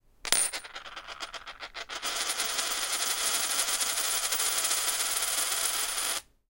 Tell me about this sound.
quarter spinning on a glass table